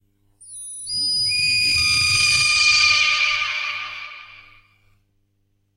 An artificial scream, produced via a feedback loop and an delayed octaver effect on an guitar amplifier.
amp: Laney MXD 30
synthetic, scream, cry